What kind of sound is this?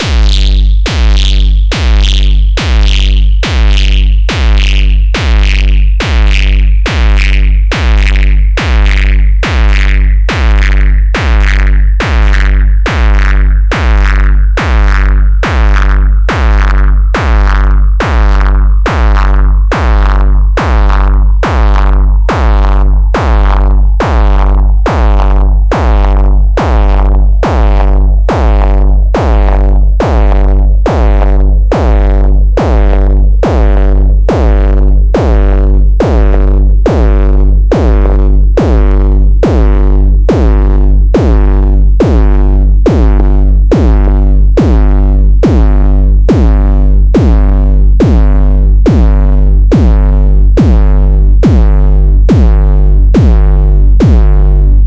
A set of 64 distorted kicks with gradually lowered equalizer frequency. Even harder distorted than the previous set. The kick is only generated with SonicCharge MicroTonic. Good for oldskool hardstyle, nustyle hardstyle, jumpstyle and hardcore.
dark, distortion, eq, gabber, hard, hardcore, hardstyle, jumpstyle, kicks, nustyle, phatt